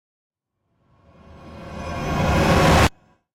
Made for scene transitions in mind. Sounds made in Kontakt and processed through Alchemy.
film, fx, horror